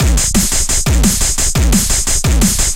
Distorted dnb loop
A heavily distorted, two bar long drum loop useful for drum and bass and jungle
174 BPM
dnb, drums, loop, jungle, bass, hard, drum, beat, distorted, 174, bar